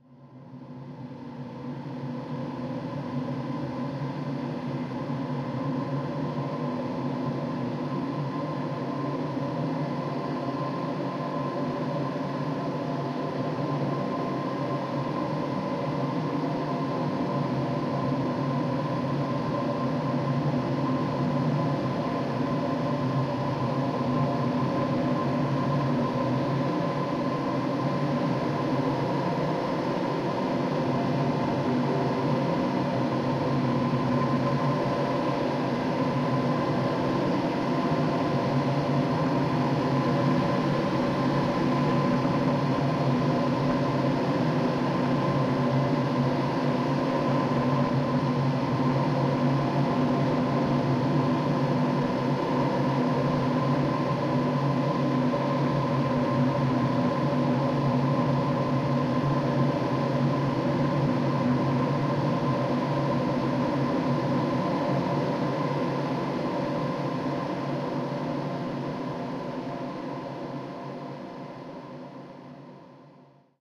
a long sound like many little bugs "working" in big wind
atmosphere, dark, drone, pad, space